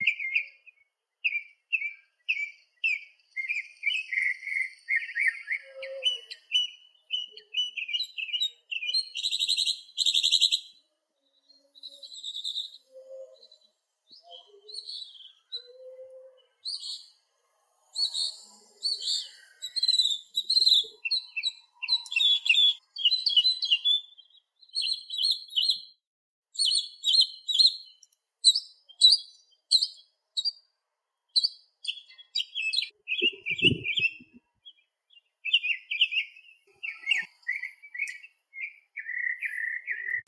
A clean recording of a single bird with a delightful song chirping. An occasional other bird or two joins in. Also a morning dove can be heard softly once or twice.